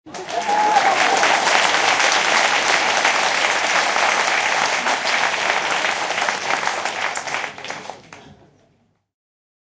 9s long applause9s long applause in medium theatreRecorded with MD and Sony mic, above the people
applause, auditorium, crowd, czech, laugh